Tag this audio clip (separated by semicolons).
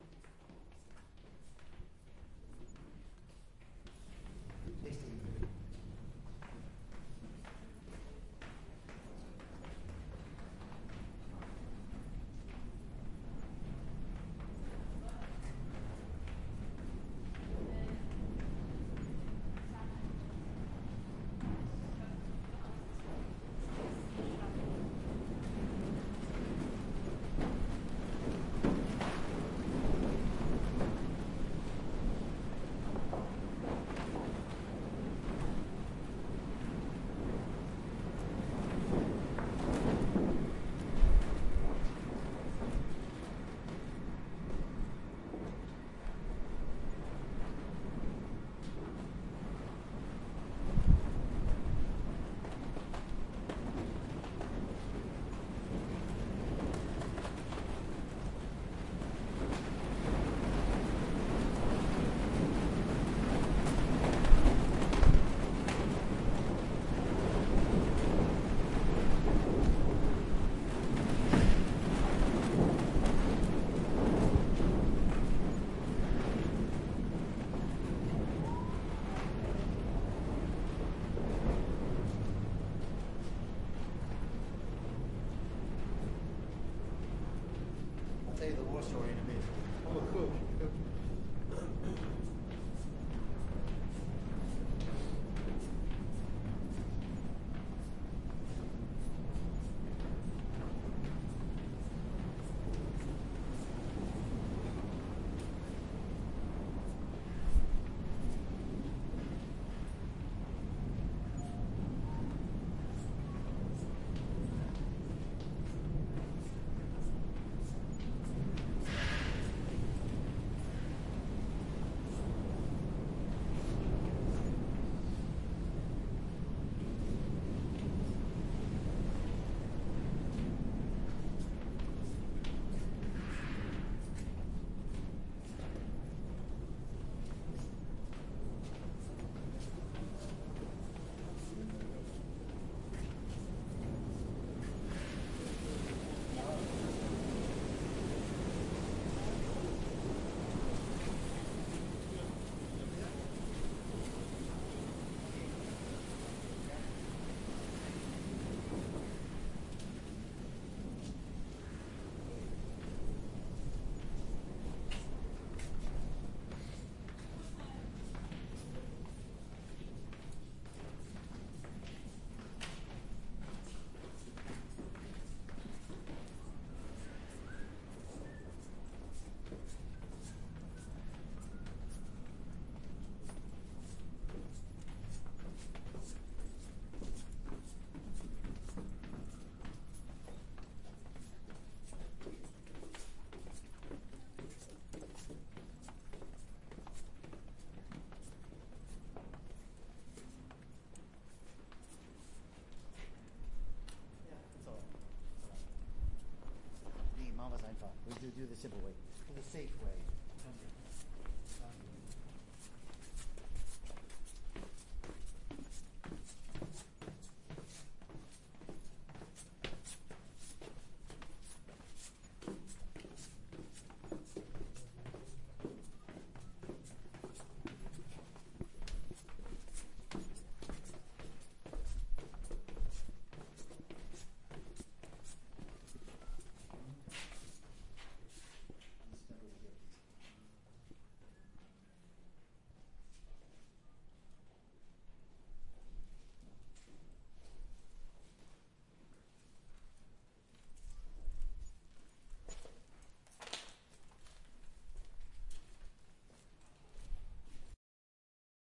building; abandoned; wind